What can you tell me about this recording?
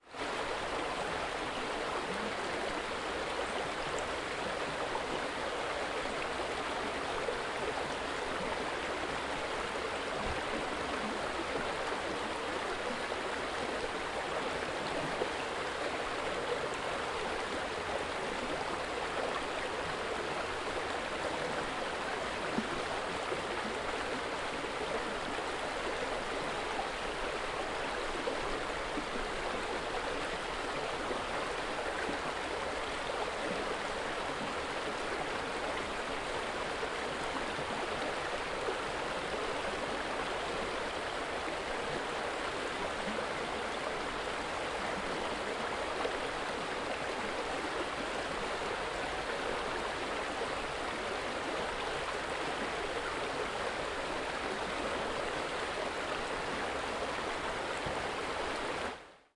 rushing river in the woods
rushing, woods, river